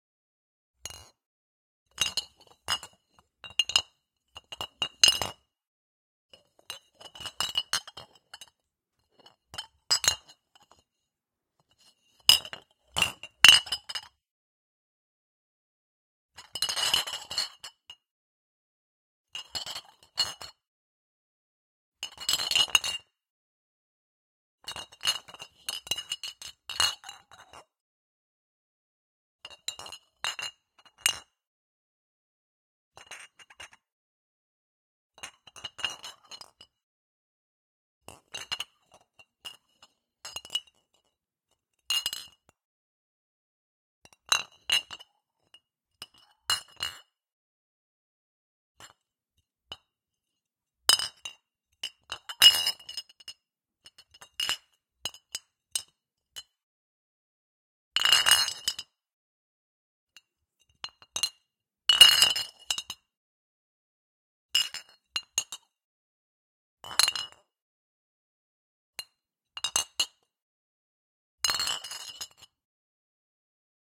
Glass Bottles 03
Small pile of glass bottles clinked together.
CAD E100S > Marantz PMD661
glass-bottle, glass-bottles